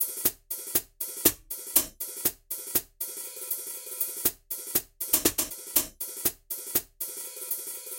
hihats 120bpm-05
120bpm, club, dubstep, electro, electronic, hihats, house, snare, techno, trance